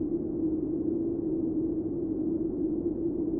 Submarine travel
Created with Music Forge Project Library
Exported from FL Studio 11 (Fruity Edition)
Library:
Patcher>Event>Submarine>Travel>Simple
Simple, Event, MFP, Travel, Submarine, Music-Forge-Project